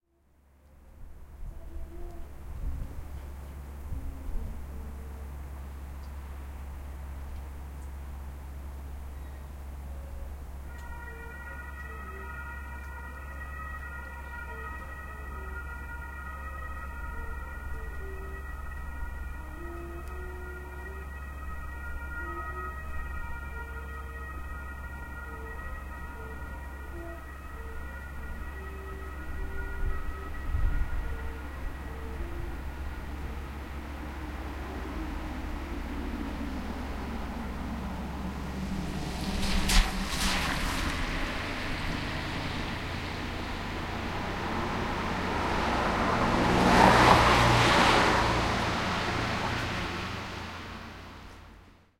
Calm Rainy Street And Passing Cars 1
Evening scene from a calm street in front of my studio in Bayreuth, Germany with passing cars on a wet street, someone practicing saxophone and a siren in the background.